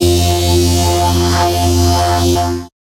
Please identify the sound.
Prime2AWTLeadF1160bpm
Prime 2 AWT Lead F1 - Full spectrum lead sound made with wavetable synthesis, saturation, and a little modulation, compression, and saturation
**There is also a Bass/Lead counterpart for this sound found in this pack. In most cases the only difference is that the fundamental frequency is one octave up or down.**